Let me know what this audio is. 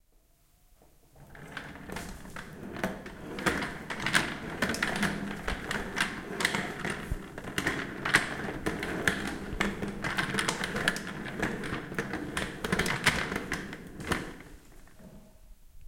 rolling office chair. suitable for looping.